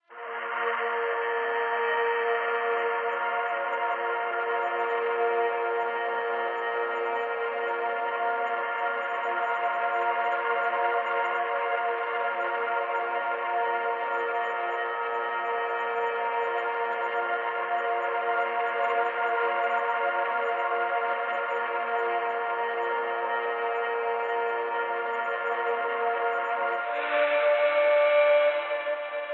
another spacey pad, one of my favorites.